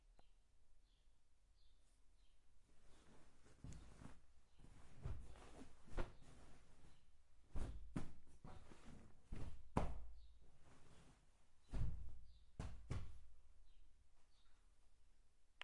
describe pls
MySounds GWAEtoy plastic bag
field, TCR, recording